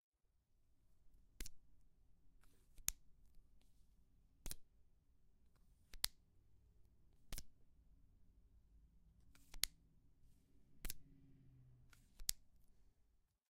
Highlighter (Manipulation)
Opening and closing highlighter cap. This is the manipulated file.
manipulation, cap, highlighter